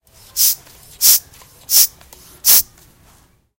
mySound WBB Ward
Sounds from objects that are beloved to the participant pupils at the Wijze Boom school, Ghent
The source of the sounds has to be guessed, enjoy.